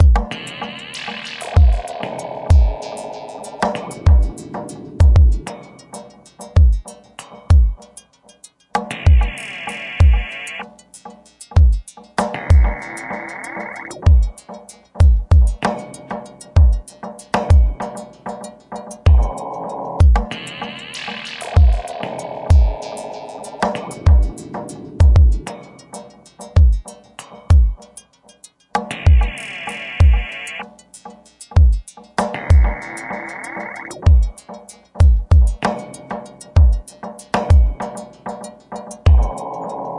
resonoid mgreel
Formatted for the Make Noise Morphagene.
This reel consists of a spliced drum loop. The final splice is the whole loop without any splices.
Solenoids hitting a hand drum and a heater grate. Modular drums and resonators.
drum-loop, mgreel, modularsynth, morphagene, piezo, solenoids